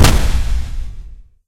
1st cannon like boom. Made in Audacity.
cannon, big, gun, fire, fight